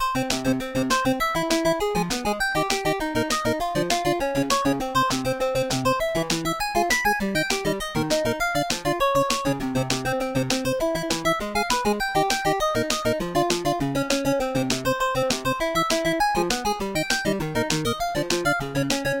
200 bpm-ed stupid loop (8bit style) originaly made for hardtek